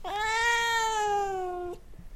Unsatisfied cat complaints to his owner.